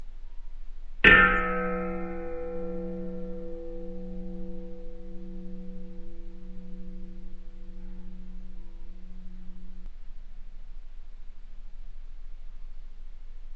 A recording of a simple metal kitchen bowl, hit with a wooden spoon.
Recorded with a TSM PR1 portable digital recorder, with external stereo microphones. Edited in Audacity 1.3.5-beta
dong, kitchen, remix